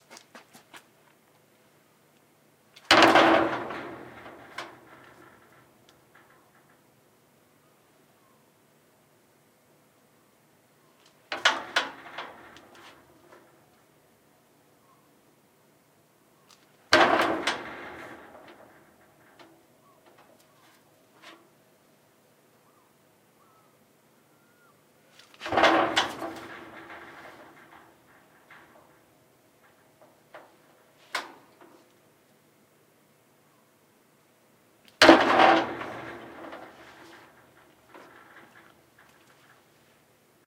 Body Hit On Metal Fence 1
The sound of a body/a person slamming against a metal fence. Recorded outdoors.
body, crash, fence, hit, impact, metal, metallic, slam, thud, ting